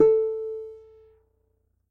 my mini guitar aria pepe